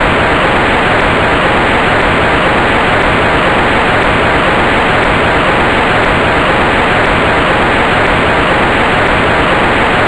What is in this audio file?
Rocket Take-off Sound
A sound created by modifying white noise in Audacity to create a rocket-like sound.